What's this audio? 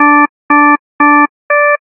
simple beep signal for attention
attention, beep, signal